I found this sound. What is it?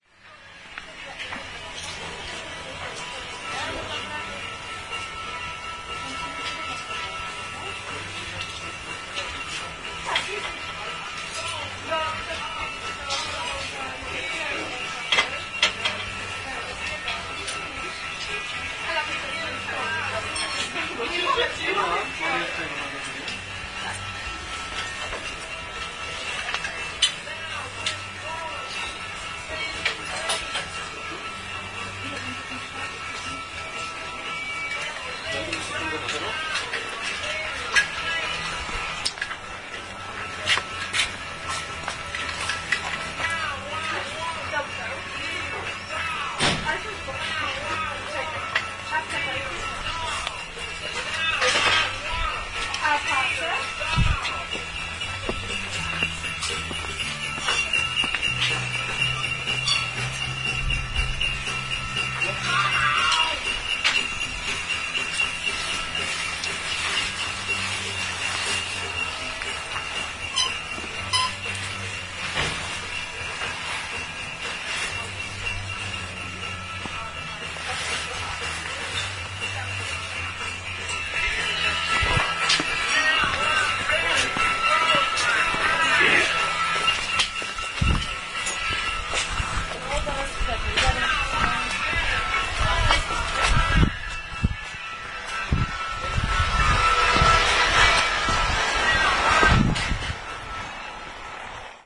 30.10.09: about 17.30, in the Bestseller clothes shop on Św. Marcin street in the center of Poznań/Poland. General ambience: some voices, steps, music in the background, going through clothes, squeaking of stands.
ambience, field-recording, shop, shopping, clothes-shop, poznan, music, clothes